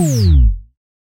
A simple bass/synth hit made with Logic's es2.